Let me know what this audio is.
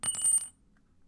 key drop
Took a key and dropped on the ground
Metal, Coin, Ground, Key, Bing, Cling